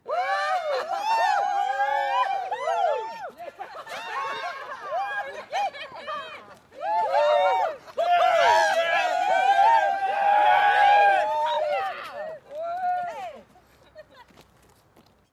Group of people - Cheering - Outside - 08
A group of people (+/- 7 persons) cheering - exterior recording - Mono.
cheering, group, people